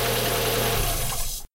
Car engine stop 2
I recently contributed a track to a Triple LP set of krautrock cover versions ("Head Music 2", released by Fruits De Mer Records, December 2020). The song I chose to cover was Kraftwerk's "Autobahn".
If you know the track, you'll know that it uses synthesised traffic sounds alongside recordings of the same. On my version, I achieved these in three ways:
2) I got in my car with my Zoom recorder and made my own recordings of the engine starting, stopping, etc
3) I made my own sound effects using virtual synths and effects in Ableton Live 10
This particular sound falls into the second category.
car-engine, driving, traffic, car, engine-stop, stop, road, engine, cars, street, car-engine-stop